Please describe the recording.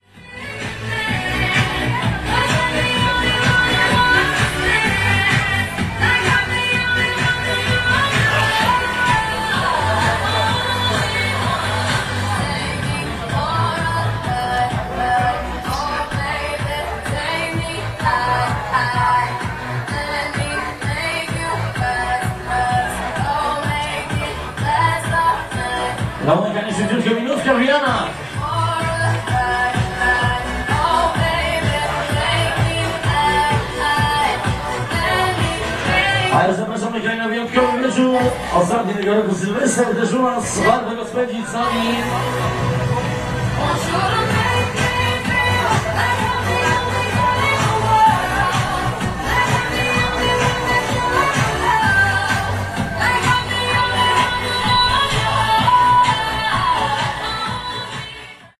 31.10.10: about 23.00. in front of Corner Pub on Kosciuszki street in Poznan. the dancing sound recorded out of widows. I was sitting on the street. from time to time the master of ceremony's voice is audible.